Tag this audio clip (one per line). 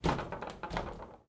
Field-Recording
University